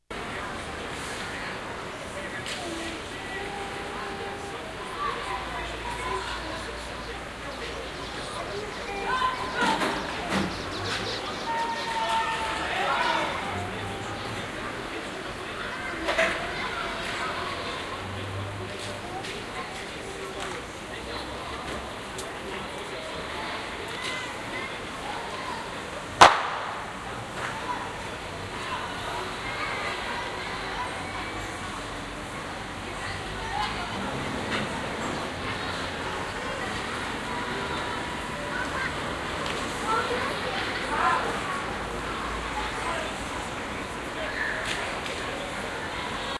rio street noise
Street noise and a gun shot from a near by favela heard out of a window at the 14th floor of a big building in Rio de Janeiro in the Laranjeiras quarter in July, 1996. Sony Datrecorder TCD D8, OKM binaurals.
cars, children, traffic, people, noise, bang, gun, brazil, shot, town, street